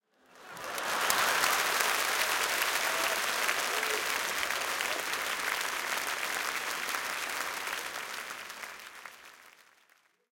crowd applause theatre